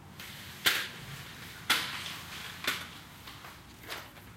sound of opening a curtain